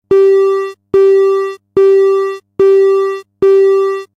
School Bell Tone 2
Electronic school bell tone. Ripped from my school alarm system.